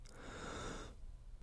Medium length inhale. Male.
breath, inhale, medium